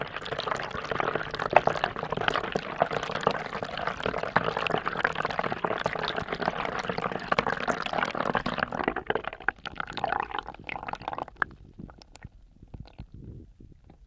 Water kitchen sink
Filled up the kitchen sink and then pulled the plug. Recorded the sound as the water flowed down the plastic drain pipe until the sink was emptied.Used a home made contact mic (piezo transducer) feeding a Zoom H1 portable recorder.
gurgle, pipe, flow, kitchen, pipes, water, flowing, drain, kitchen-sink